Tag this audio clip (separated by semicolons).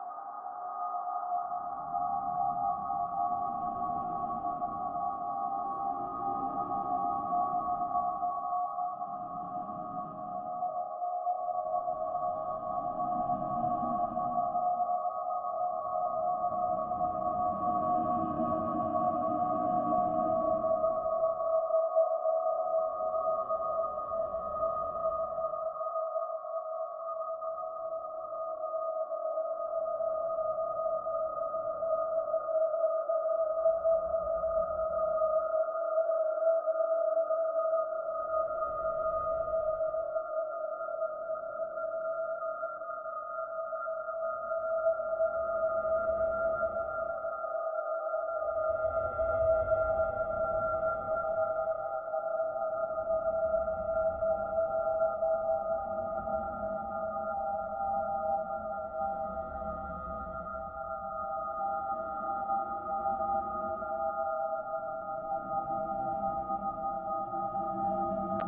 Ambiance; Creepy; Metallic